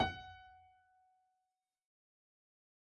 f# octave 6